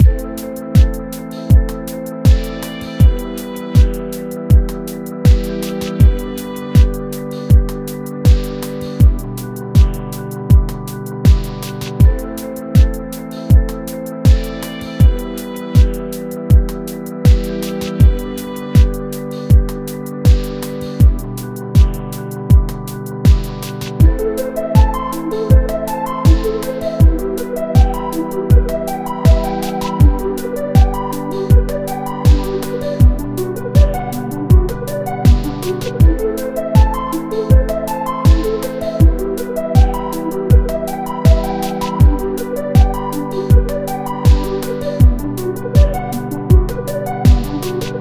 Sad kingdom (loopable)
A collection of loop-able sounds from MSFX’s sound pack, “Cassette ‘One’”.
These sounds were sampled, recorded and mastered through the digital audio workstation (DAW), ‘Logic Pro X’. This pack is a collection of loop-able sounds recorded and compiled over many years. Sampling equipment was a ‘HTC Desire’ (phone).
Thank you.